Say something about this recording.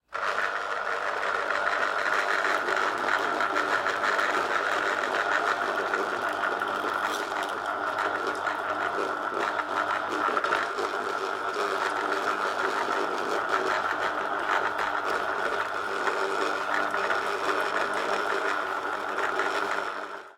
Squeezer Braun sound recorded with Sennheiser MKH 416 and Zoom F8 as recorder.